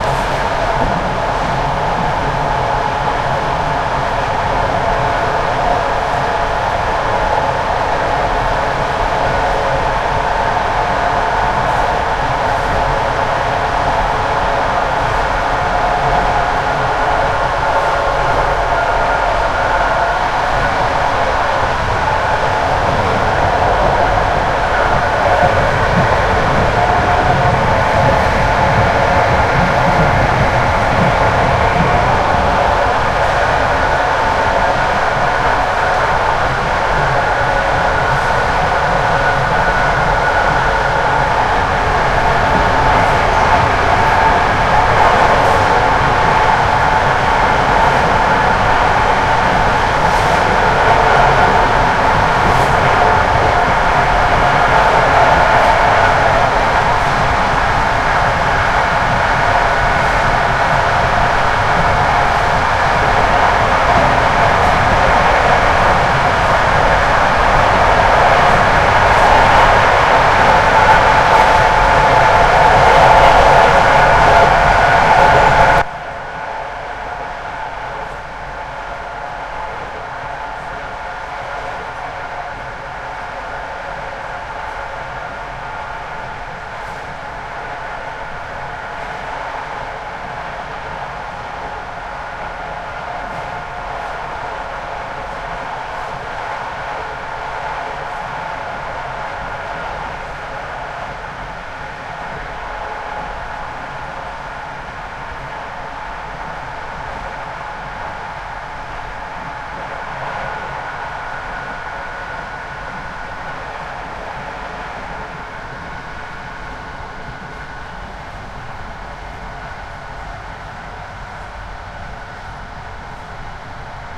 Ambience Train 2
It is taken inside the local train during the journey.